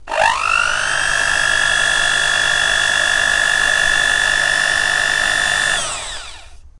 BEAT01MT
A sample of my Sunbeam Beatermix Pro 320 Watt electric beater at low speed setting #1. Recorded on 2 tracks in "The Closet" using a Rode NT1A and a Rode NT3 mic, mixed to stereo and processed through a multi band limiter.